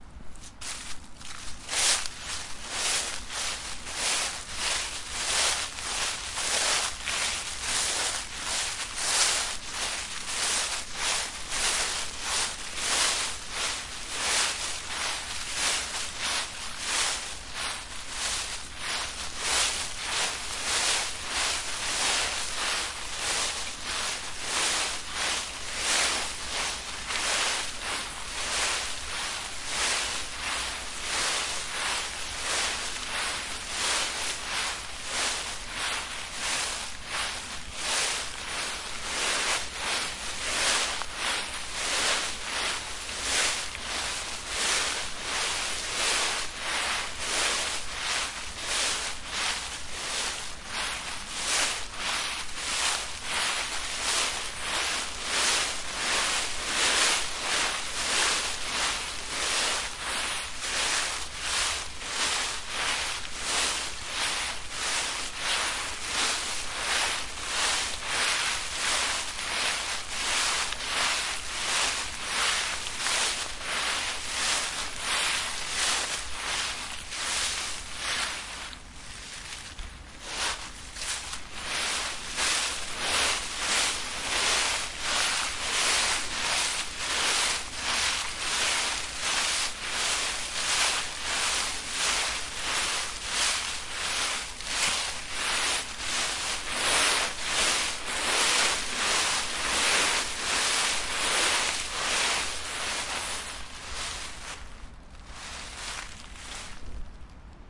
Walking through dead leaves piled at the side of the path, at Brecon Promenade in November. Sound of a weir on the river Usk in the background. Recorded with a Tascam DR-05 with Deadkitten.
leaves, autumn